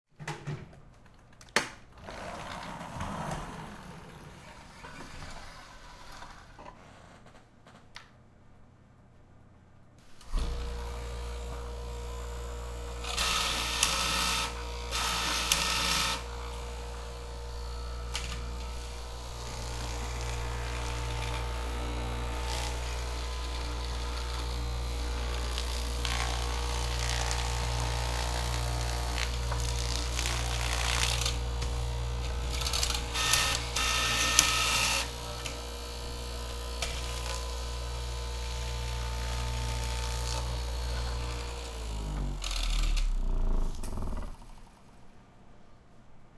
Seamstress' Straight Knife Machine
Recorded at Suzana's lovely studio, her machines and miscellaneous sounds from her workspace.
Knife, Machinewav, Seamstress, Straight